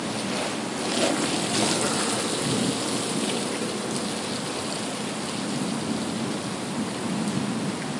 Here is a recording I made at school while I was caught in the rain waiting for it to pass. It's someone rolling by on a skateboard.